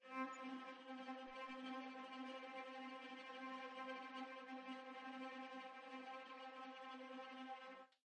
One-shot from Versilian Studios Chamber Orchestra 2: Community Edition sampling project.
Instrument family: Strings
Instrument: Cello Section
Articulation: tremolo
Note: C4
Midi note: 60
Midi velocity (center): 31
Microphone: 2x Rode NT1-A spaced pair, 1 Royer R-101.
Performer: Cristobal Cruz-Garcia, Addy Harris, Parker Ousley
c4, cello, cello-section, midi-note-60, midi-velocity-31, multisample, single-note, strings, tremolo, vsco-2